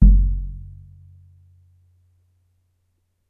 Nagra ARES BB+ & 2 Schoeps CMC 5U 2011.
bass drum hit on the hand
bass, drum